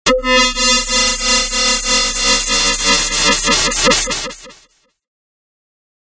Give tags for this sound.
120-bpm
2-bars
DX-100
FM-synthesis
Harsh
industrial

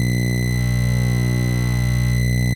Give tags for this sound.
two
yamaha

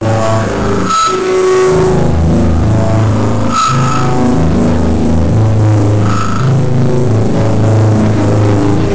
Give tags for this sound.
amplified
guitar
effects

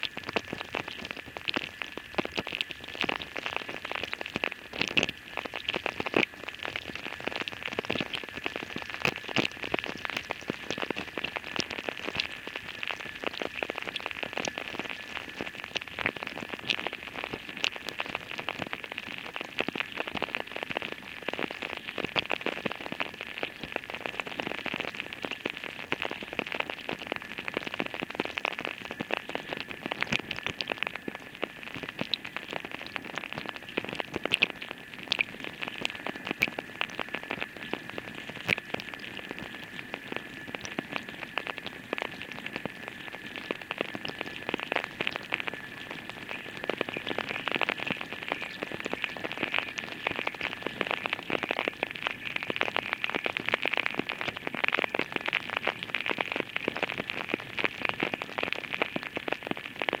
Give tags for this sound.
Abstract
Design
Hydrophones
Sound
sounds
textures
Water

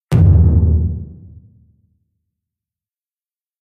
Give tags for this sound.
bang
drum
drums
echo
reverb

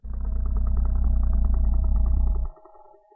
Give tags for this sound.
alien
creature
creature-roar
lion
lion-roar
monster
monster-roar
roar
roaring
volf
wolf

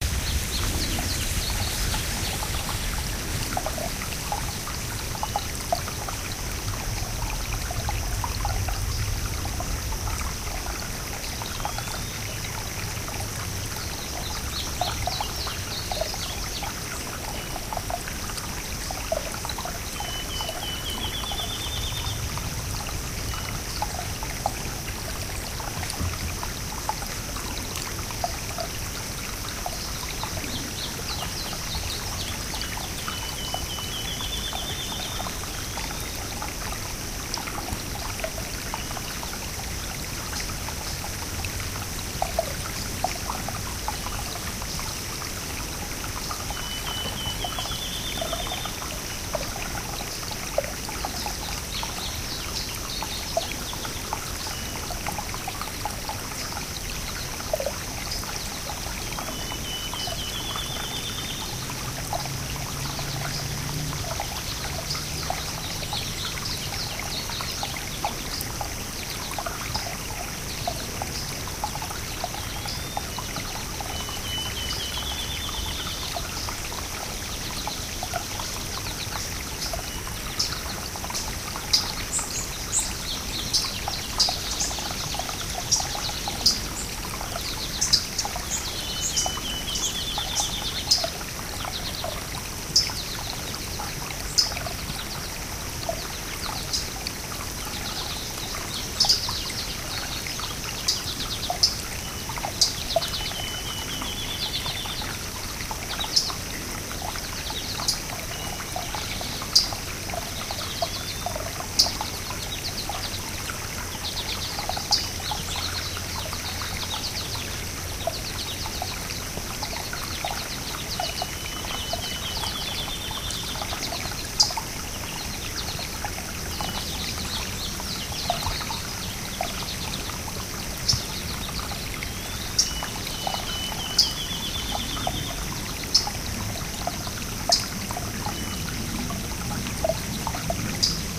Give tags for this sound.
birds
field-recording

